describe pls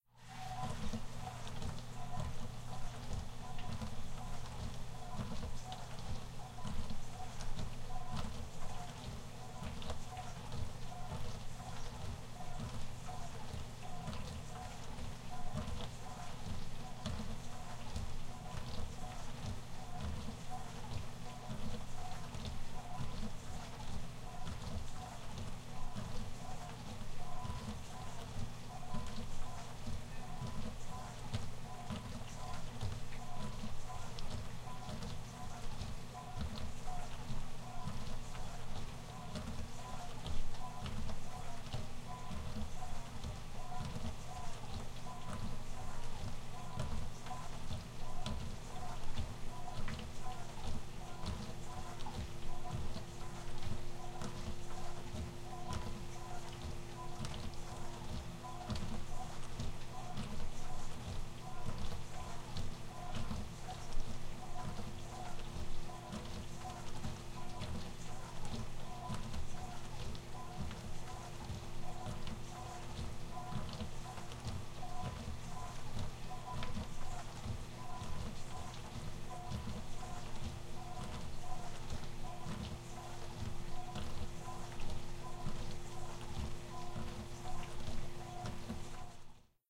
Sound of dishwasher running.